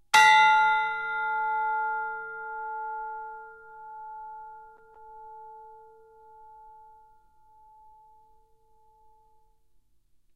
chimes f4 ff 1
Instrument: Orchestral Chimes/Tubular Bells, Chromatic- C3-F4
Note: F, Octave 2
Volume: Fortissimo (FF)
RR Var: 1
Mic Setup: 6 SM-57's: 4 in Decca Tree (side-stereo pair-side), 2 close